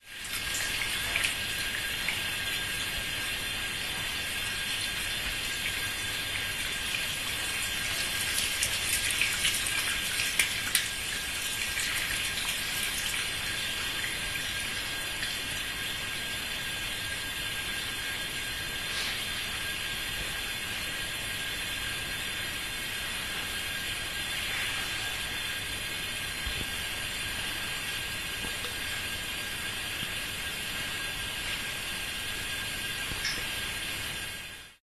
24.12.2010: about 15.00. my family home. the sound of pipes. corridor. Jelenia Gora (Low Silesia region, south-west Poland).
swoosh, field-recording, water, pipes